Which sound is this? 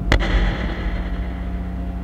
reverb switch flick
a flick of a switch with effects including reverb.
flick, reverb, experimental, switch